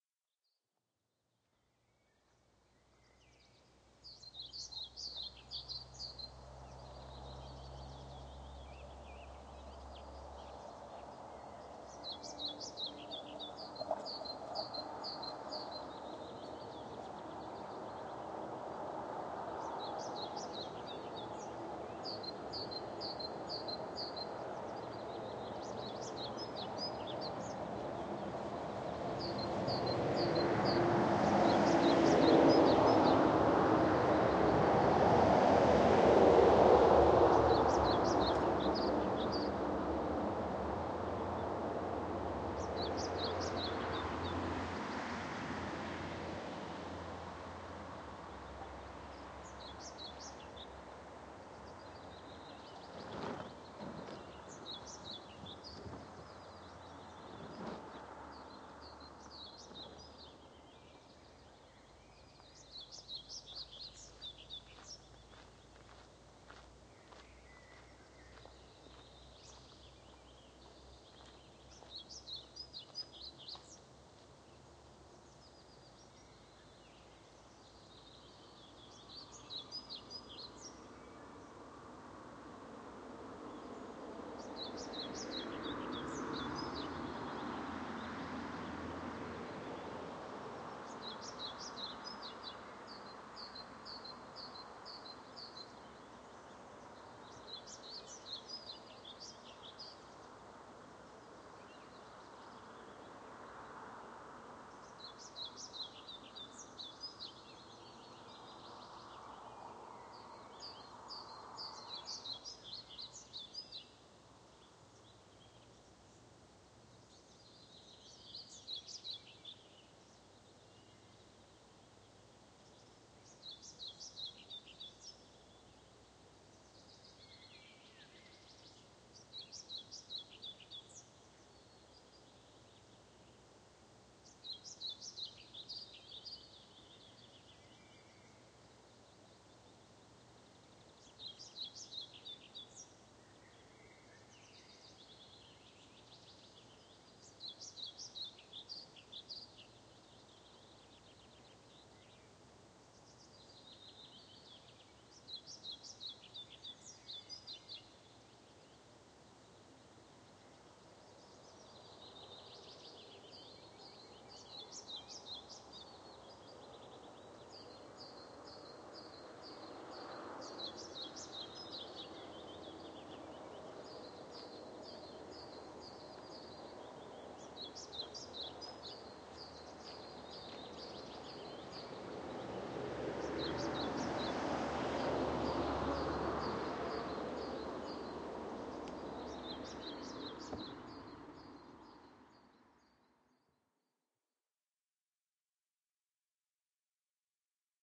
Camp Norway RF
Straight R09 recorder/ camping next to a road somewere in amazing Norway